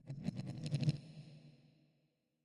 pingpong ball passage low
ball, pong, low, ping